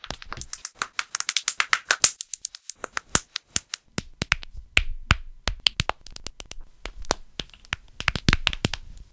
knuckle cracks
Various hand,fingers,back and foot cracks.
knuckles, cracking, knuckle-cracking